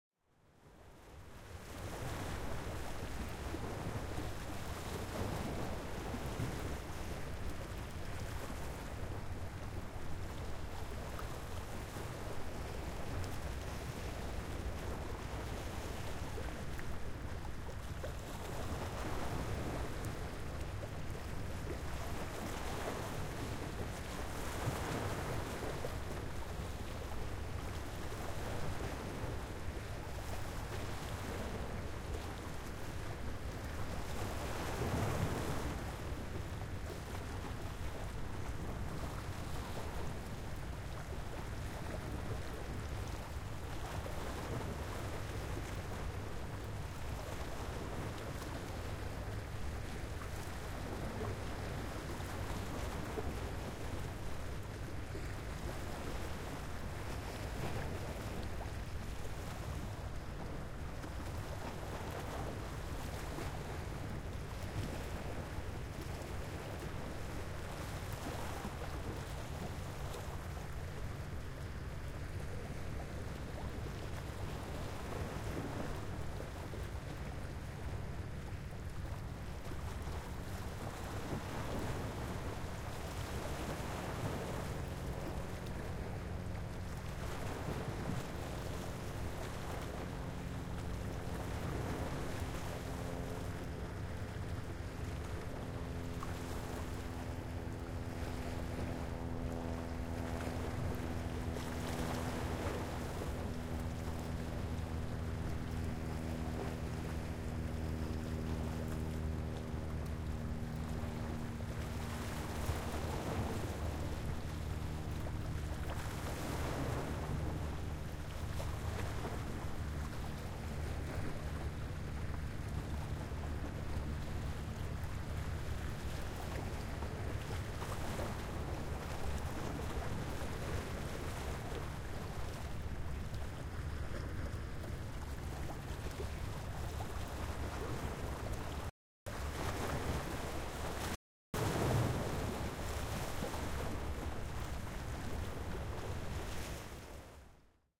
sample pack.
The three samples in this series were recorded simultaneously (from
approximately the same position) with three different standard stereo
microphone arrangements: mid-side (mixed into standard A-B), with a
Jecklin disk, and with a Crown SASS-P quasi-binaural PZM system. To
facilitate comparison, no EQ or other filtering (except
level normalization and mid-side decoding, as needed) has been applied.
The 2'28" recordings capture small, choppy waves breaking against the
rocky shore of the San Francisco Bay at Cesar Chavez Park in
Berkeley, CA (USA) on October 1, 2006. The microphones were positioned
approximately 6 feet (2 meters) from the Bay's edge, oriented toward the
water. A small airplane flying overhead becomes audible at about one
minute into the recording.
This recording was made with a pair of Audio-Technica AT-3032
omni-directional microphones (with Rycote "ball gag" windscreens)
mounted on a Jecklin disk and connected to a Sound Devices 744T
airplane, audio-technica, bay, field-recording, jecklin, rocks, shore, water, waves